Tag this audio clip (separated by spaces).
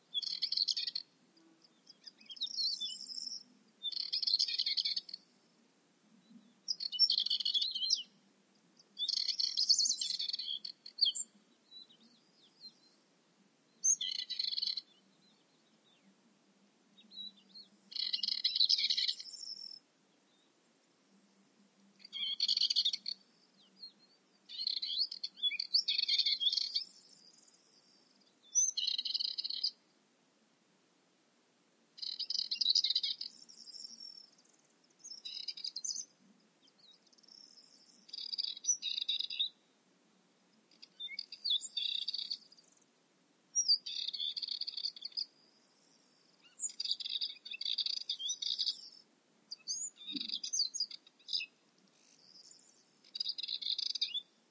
field-recording warbler curruca south-spain